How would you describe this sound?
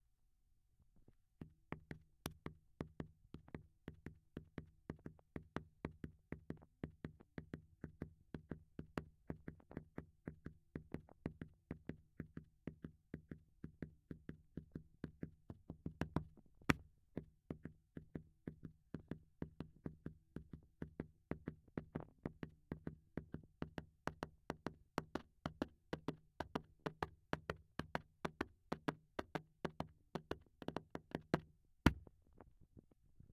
A contact microphone recording a person rhythmically tapping a wall
tap, tapping